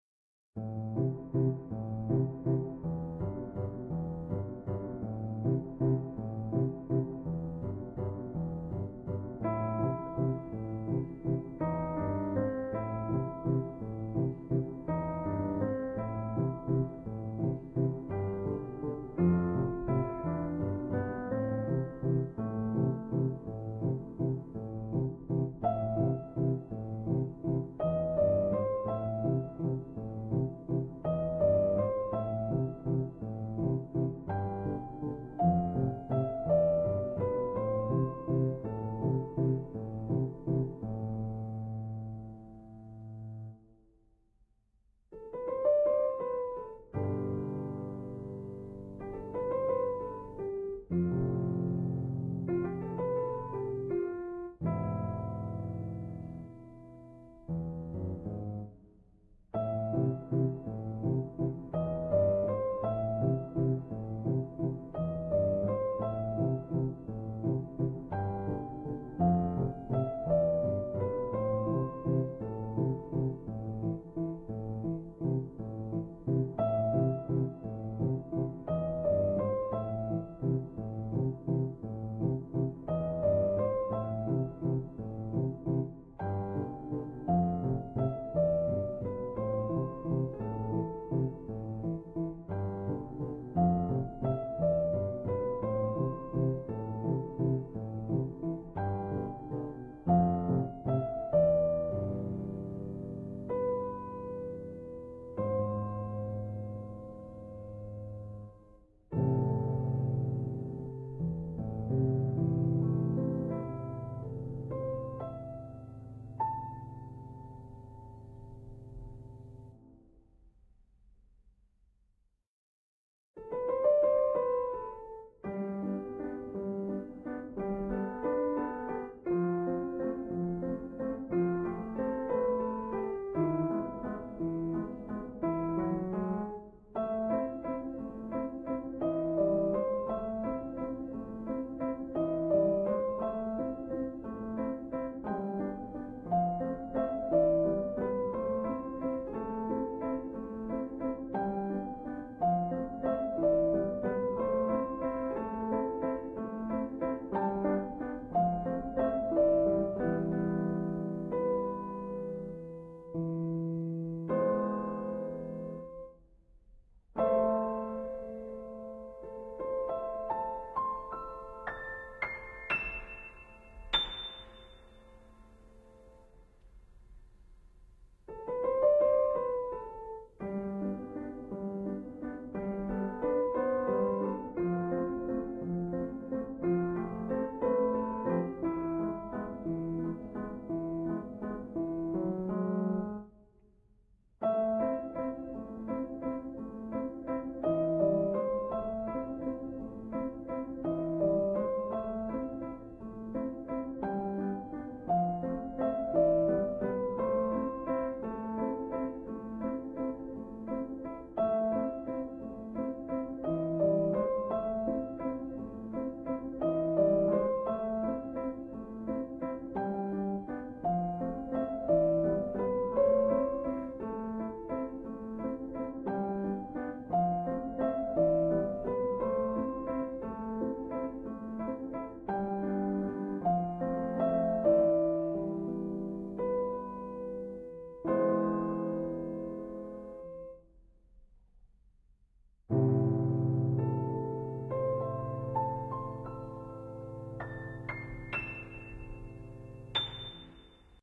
4. Lacuna - Jorge A
canción para fondear. song for a musci bed. recorded with alesis interfaz an shure microphone
canci, n, song